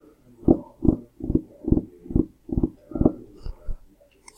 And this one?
Tapping Fingers nervously
This is me tapping my fingers nervously.
fingers, nervous, tapping